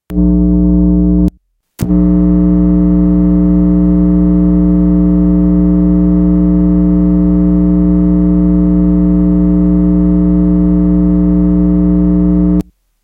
Phone transducer suction cup thing on 2 different spots on an electric pencil sharpener.